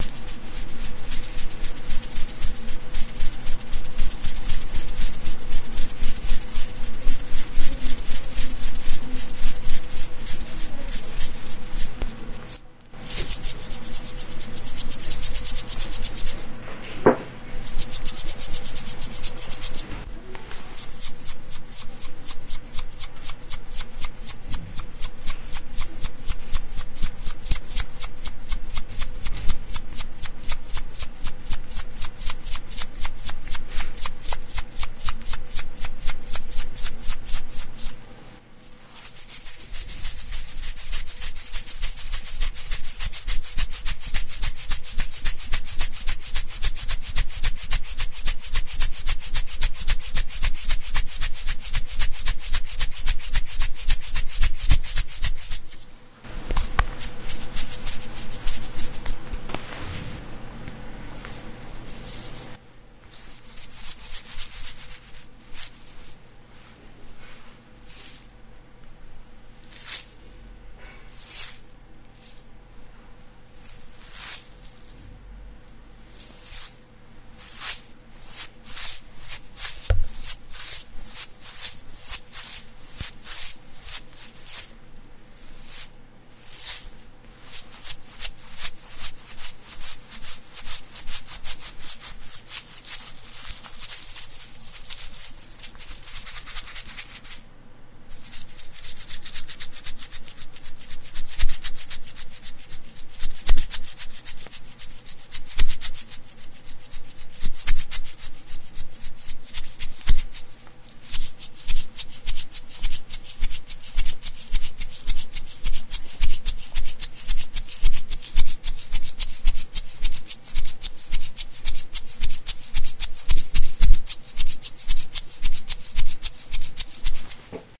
Sonido de salero. Shaker sound
pimienta, sal, salero, salt